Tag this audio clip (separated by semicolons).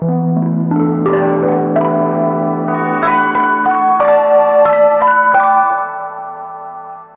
processed; harmonics; guitar; musical-instruments